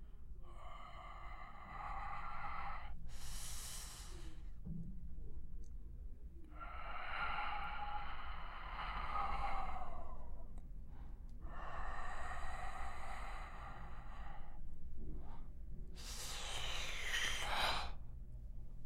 6. Presencia de cruatura Creature presence
The sound of a dark creapy creature, made with my mouth
Creature, Dark